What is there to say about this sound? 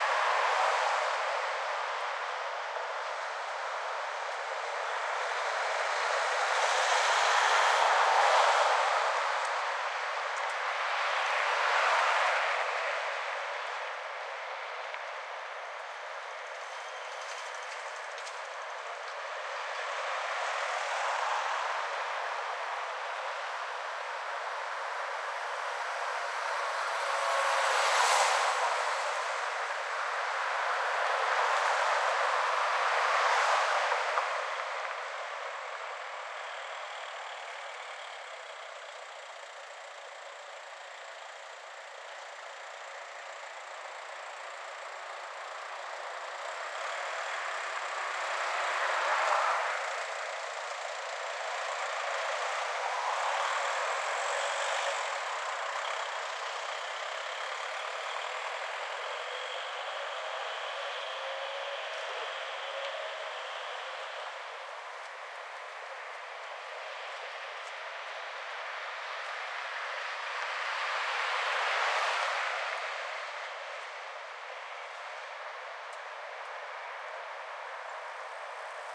Recording from a road in Copenhagen. Cars and push bikes driving by.
cars-driveing, road, road-in-city, road-noise, traffic